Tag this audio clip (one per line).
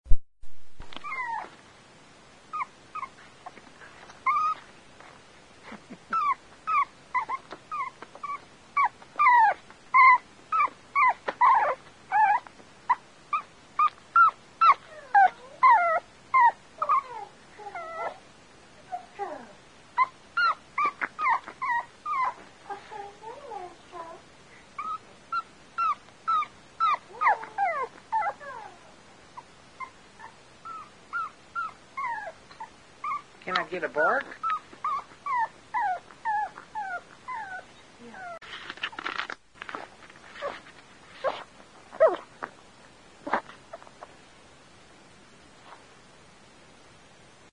puppy
bark
cry
dog
newborn